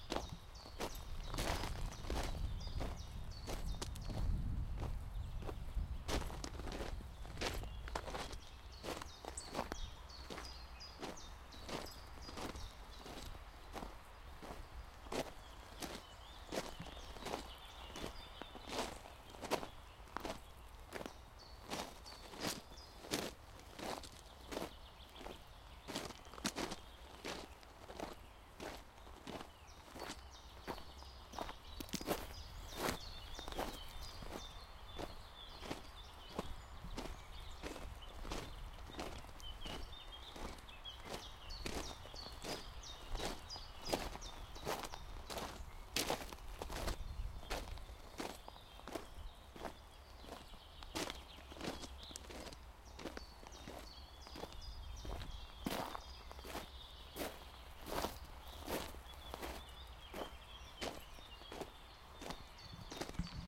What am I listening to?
Walking on a gravel forest road. River noise nearby.